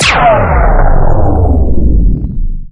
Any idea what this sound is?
laser drop jingle component